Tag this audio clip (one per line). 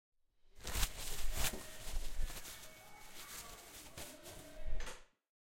CZECH
CZ
PANSKA